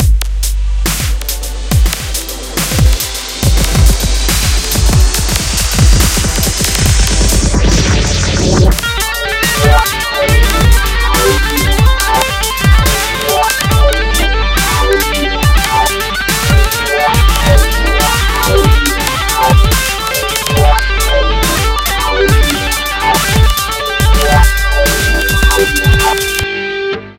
cool soundtrack made with audacity on an asus laptop
CAUTION
LOUD MUSIC MAY RESULT IN LOSS OF HEARING OR DAMAGE OF INNER EAR
I AM NOT TO BE HELD RESPONSIBLE FOR ANY OF THE ABOVE CONSEQUENCES
music, loud, cool